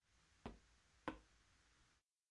6-pisada zapatos
pisar con zapatos
pisada, pisar, zapatos